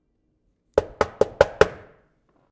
knocking GOOD A 3
Mono recording of knocking on hard surfaces. No processing; this sound was designed as source material for another project.
door, knocking, knuckles